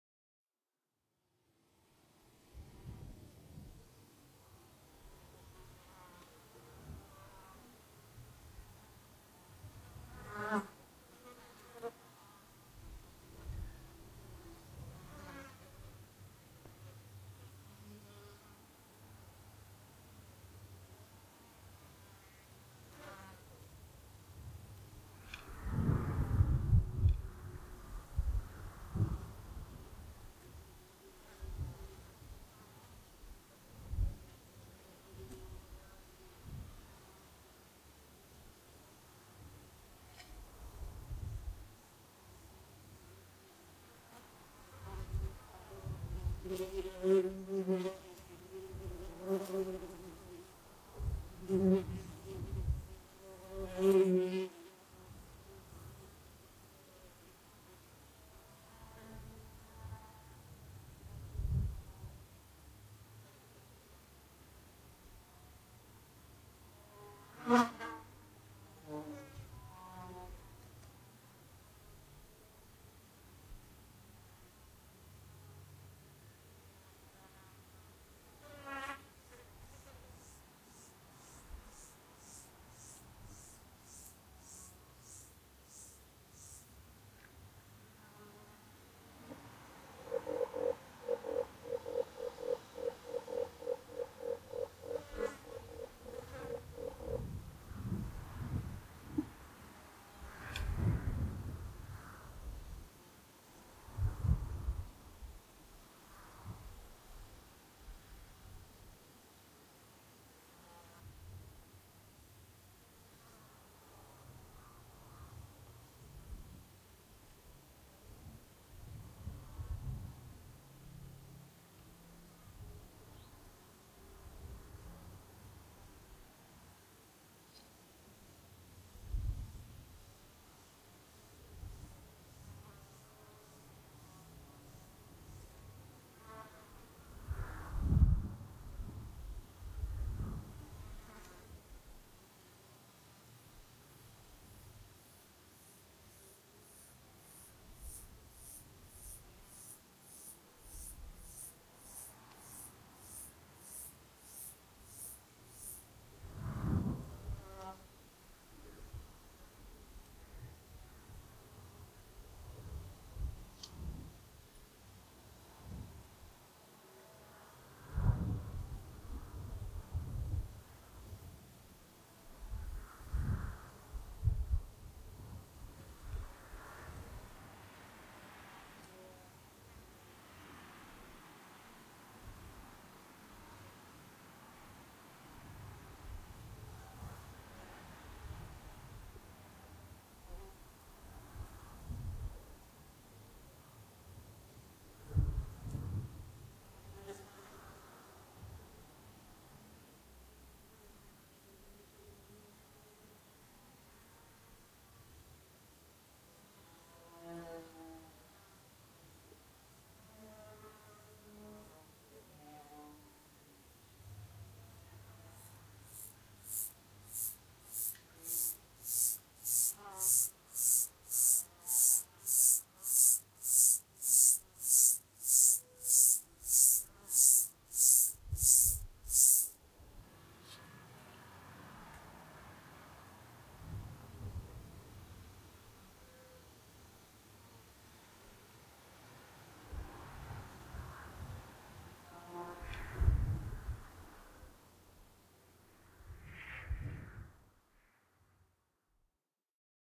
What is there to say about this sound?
Top of Hangman's Hill in Malvern HIlls, summer
Recorded for World Listening Day 2014 on 18 July in the early afternoon.
Recorded with Rode NT4 and Sennheiser MKH416 through Sound Devices 442 into Tascam DR-40. Additional processing in Sound Forge.
The NT4 had quite a lot of top end hiss that I didn't really notice until too late.
countryside, field-recording, hill, insects, malvern, nature